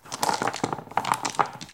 dice18shuffle
Dice sounds I made for my new game.
die, dice, stones, shuffle, roll, rolling